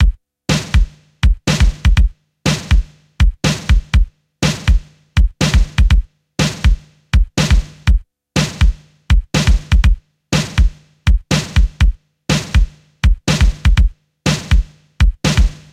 Neon Beat
122 bpm